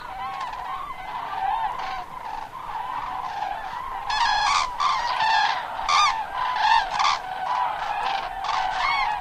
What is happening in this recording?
crane.flock.aproaching
a flock of cranes sing while flying and approaches /una bandada de grullas canta mientras vuela acercandose
birds, south-spain, nature, field-recording, andalucia